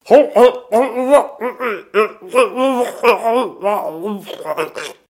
Me choking on my hand